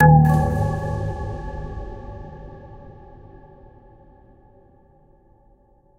SFX Touch
Fresh SFX for game project.
Software: Reaktor.
Just download and use. It's absolutely free!
Best Wishes to all independent developers.